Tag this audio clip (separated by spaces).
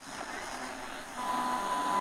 analogic radio tunning whistle